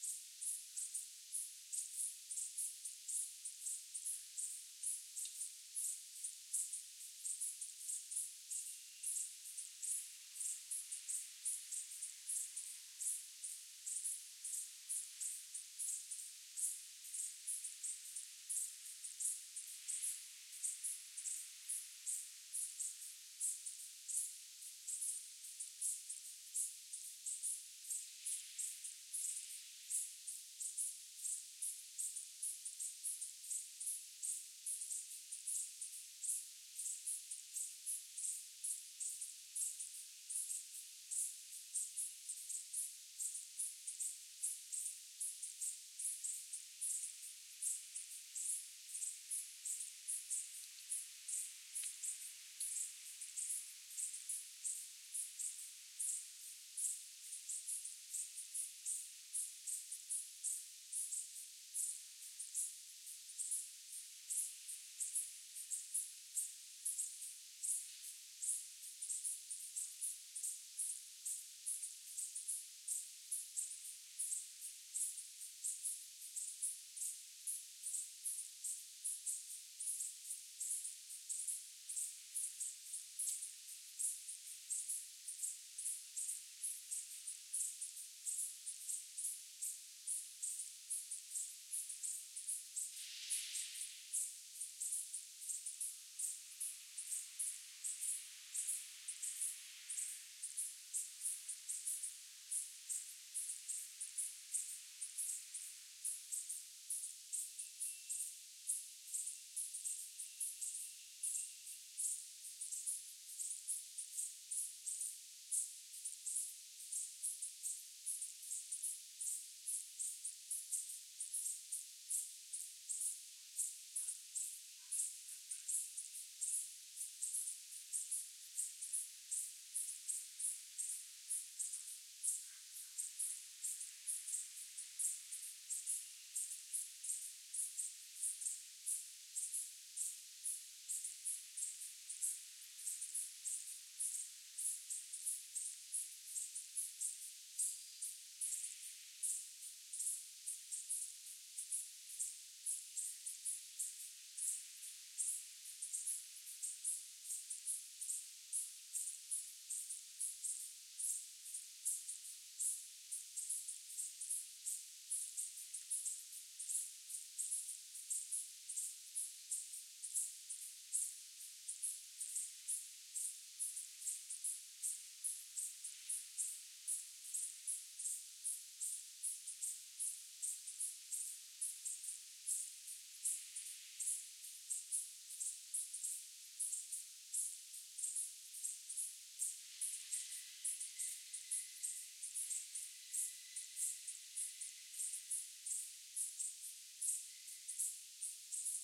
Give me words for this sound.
Night Insects Lebanon
A particular insect, maybe a grasshopper doing this sound which I use as background atoms instead of the typical cricket.
Recorded stereo on zoom h4 on board mics, i edited it to remove some of the hiss.